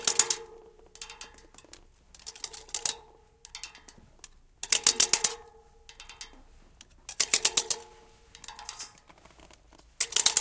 Here are the sounds recorded from various objects.
mysounds, france, square, rennes